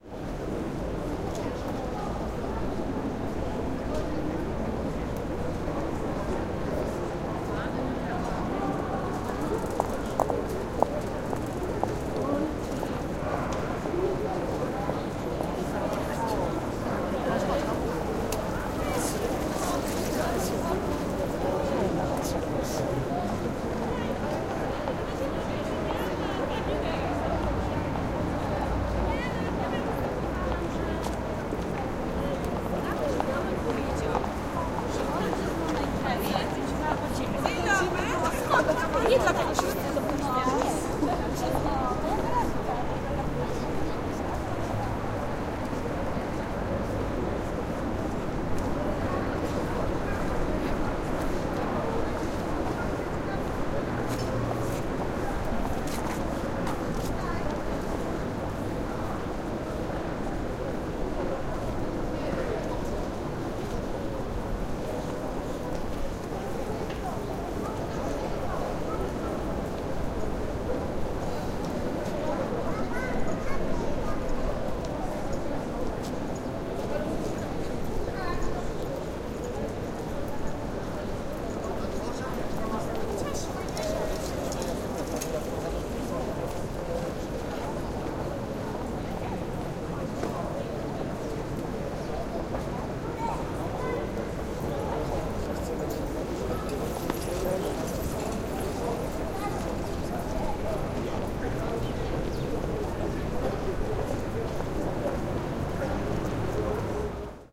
Ambience sounds recorded near the Wrocław's Town Hall.